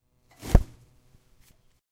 Cutting the Roll Paper
Cutting the paper of the toilet roll paper in the restroom. It is a sound that has required a major gain. It has been recorded with the Zoom Handy Recorder H2 in the restroom of the Tallers building in the Pompeu Fabra University, Barcelona. Edited with Audacity by adding a fade-in and a fade-out.
campus-upf, cut, paper, rollpaper, university, WC, zoomH2handy